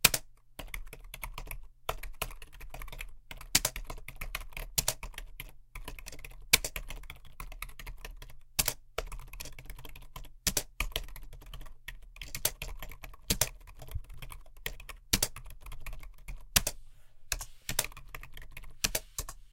Typing on a keyboard
Typing on a Logitech K120. Recorded with an AT-2020
typing, office, computer